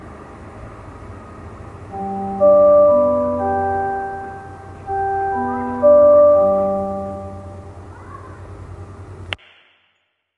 aboutheschool GWAEtoy ourschoolbell
Our electronic bell
school, TCR